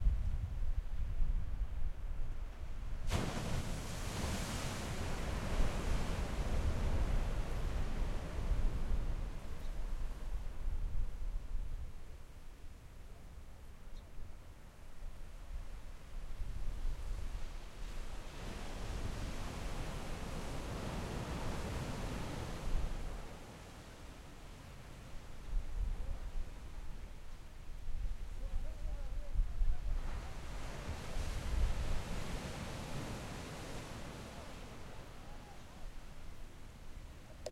The Ocean Waves
ambiance, ocean, waves